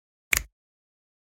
finger-snap-stereo-01
10.24.16: A natural-sounding stereo composition a snap with two hands. Part of my 'snaps' pack.
bone, brittle, crack, crunch, finger, fingers, hand, hands, natural, percussion, pop, snap, snaps